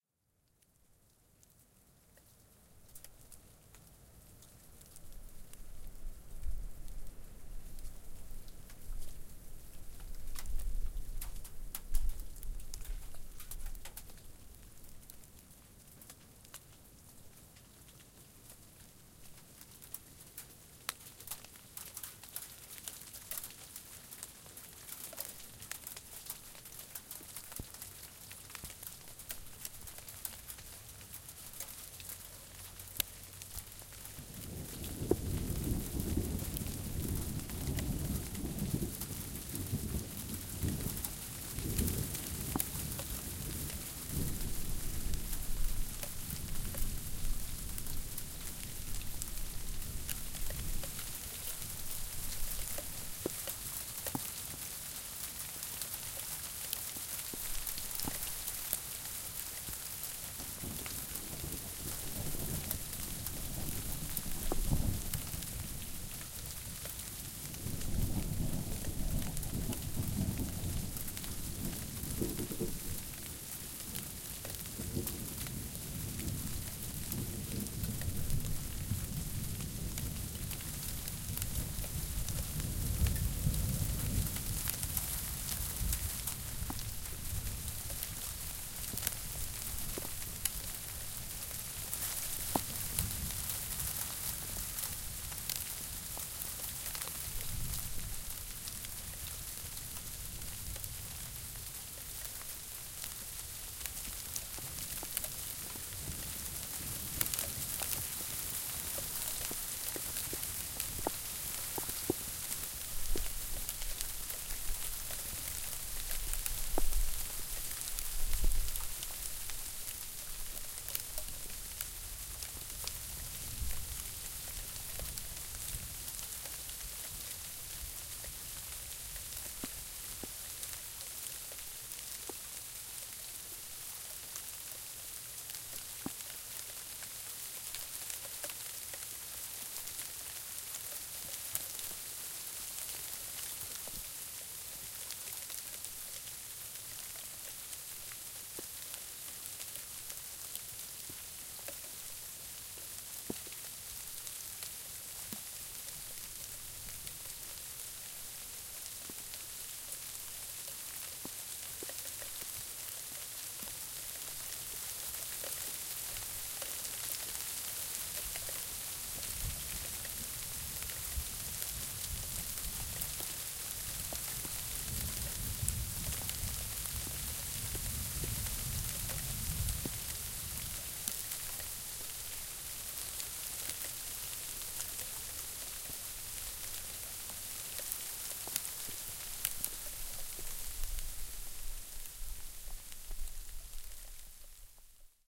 SleetThunderTitanSunMarch2nd20145AM
A recording of sleet and freezing rain with the approach of Winter Storm Titan, on Sunday March 2nd, 2014.
This recording was made at 5:00 in the morning and an air temp of 26 degrees...what a combination of winter sounds and more summer sounds as you will
hear some great Thunder at 38 seconds into the recording and 1:08 in.
Made with my Zoom H4N recorder sitting on the ground in the yard in a small town. I used the internal built-in stereo mics and had the volume on 65.
sleet, icy, raw-weather, thunder, field-recording, winter, weather, icy-wind, outdoors, nature, storm, wind